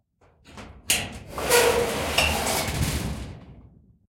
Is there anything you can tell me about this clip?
long-metal-scrape-02
Metal hits, rumbles, scrapes. Original sound was a shed door. Cut up and edited sound 264889 by EpicWizard.